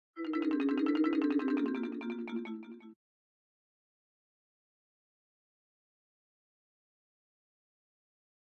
A marimba with multiple FX applied to it
170bpm, Marimba, Tumble, Warped